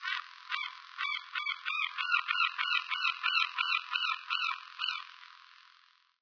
Another sample of a seagull at Whitby, UK. Recorded in mono on my point & shoot camera, then made into pseudo stereo by pasting the mono recording into both left and right channels, then inverting/flipping the right channel.
loud, seagull, gull, sea, gulls, yelling, ocean, wind, seaside, seagulls, calling, bird, birds, chirping